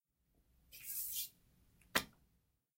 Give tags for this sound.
slide; fall; paper

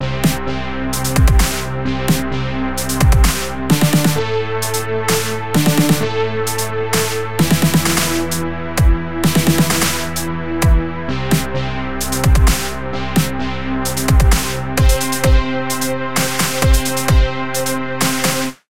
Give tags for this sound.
FL; studio; Techno; Trance

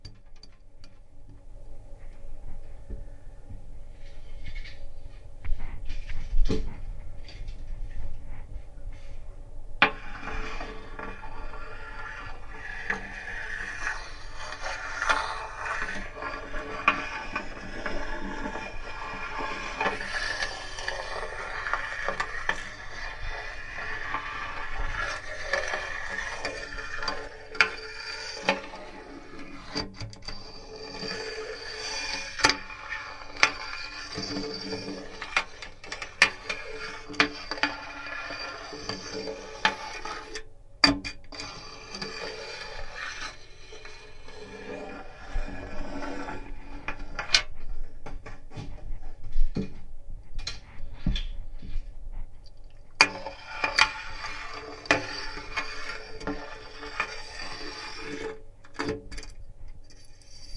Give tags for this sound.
water; scraping; bathtub; underwater; metal; hydrohpone

